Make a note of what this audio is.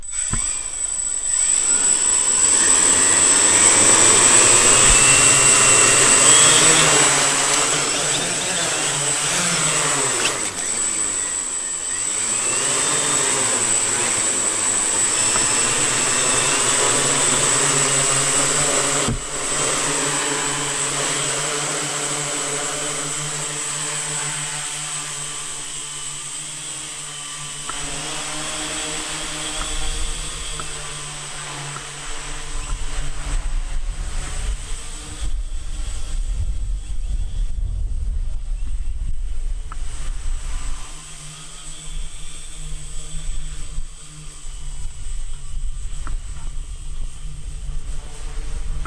Hexacopter drone flight
I recorded this sound on the stage with Zoom H4n and Sennheiser shotgun mic.
hexacopter, copter, radio, pilot, flight, drone, control